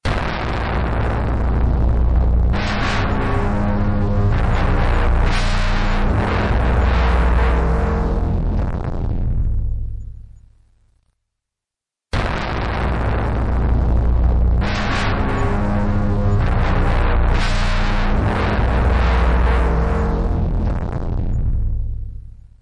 Sci-Fi Distortion
Syth wave of a flute with effects and heavy distortion.
ambient, effect, fx, sci-fi, scoring, soundesign, space, synth, synthetic, War